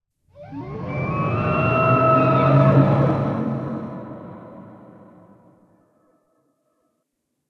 chopper screech
A bit more aggressive than the last one... Here's a wendigo's screech or howl with reverb and multiple bugles
animal, cryptid, howl, monster, roar, screech, wendigo